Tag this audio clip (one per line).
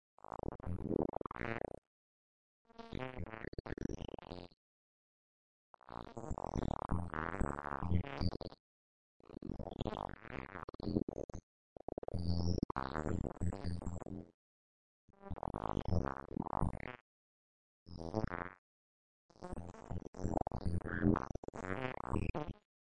alien
android
artificial
bionic
cyborg
droid
galaxy
machine
robotic
space